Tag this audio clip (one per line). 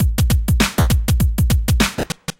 100
bit
swing
8
minimal
bpm
snare
kick